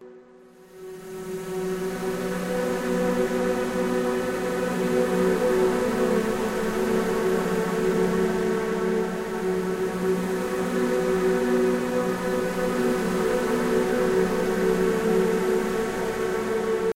BPM140-LOWFOGPAD-24thElement
Made with Massive in Ableton Live 8